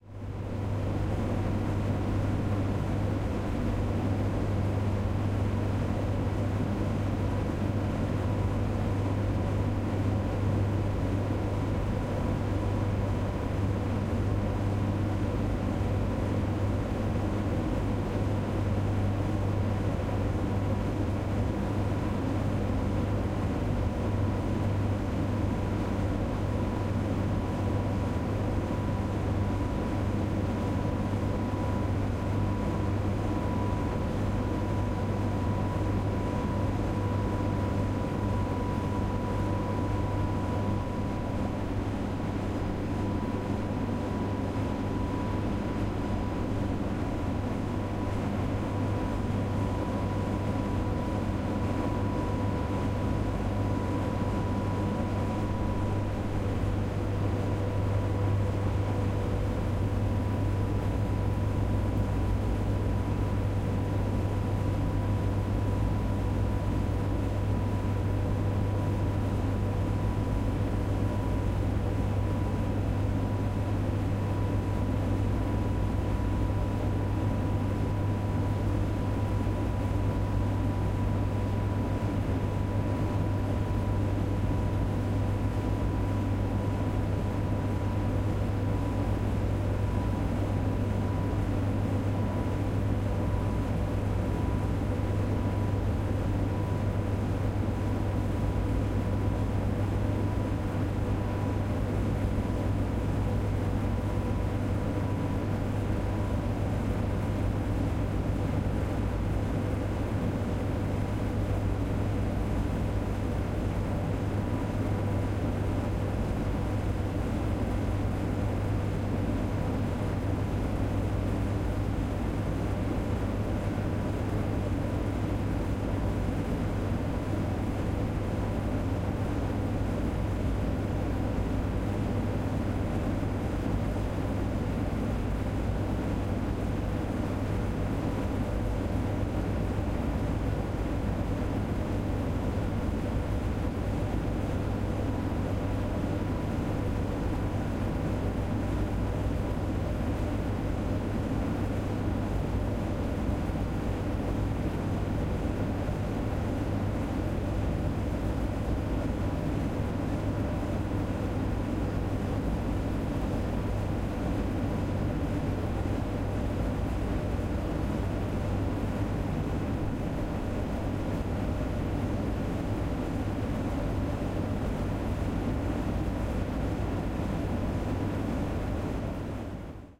Laundry room ambiance: Complex communal laundry room ambiance containing tumble dryer. Recorded with a Zoom H6 recorder using a stereo(X/Y) microphone. The sound was post-processed in order to cut out spikes in tone.
Ambiance, Ambient-sound, Laundry-room, OWI, Room, Room-tone